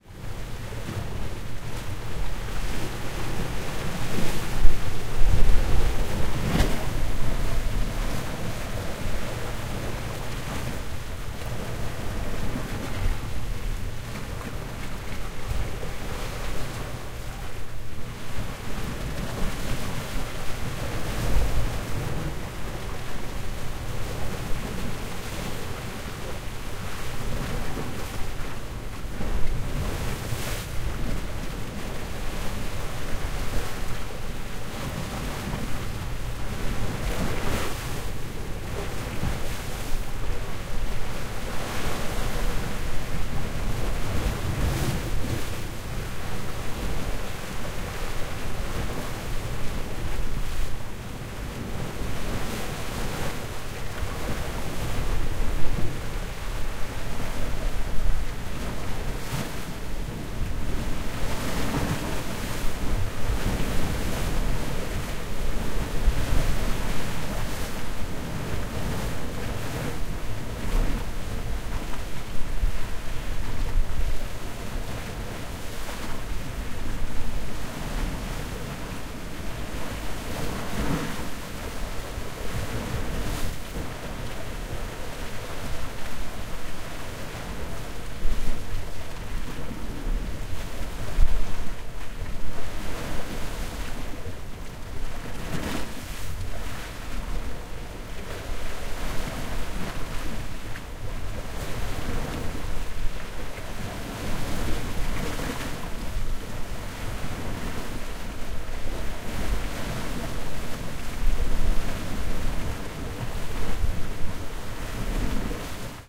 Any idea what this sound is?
Marseille - NagraVI + QTC50
Field-recording, Sea